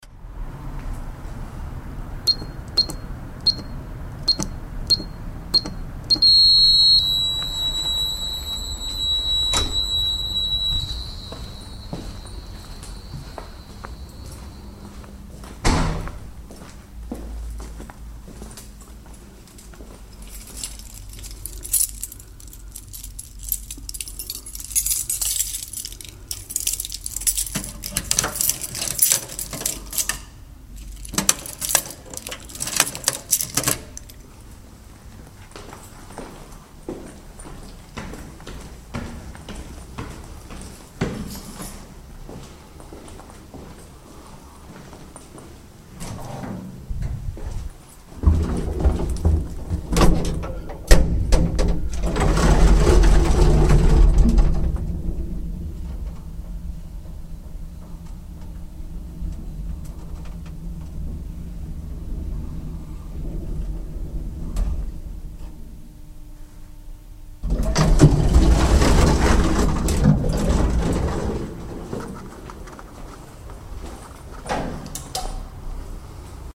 This sounds are when I arrive at home
House, staircase